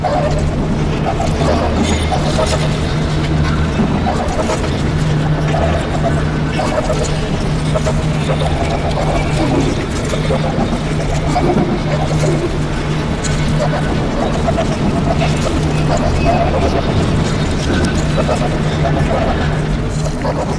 Originally a recording of my dog, highly modified in Audacity.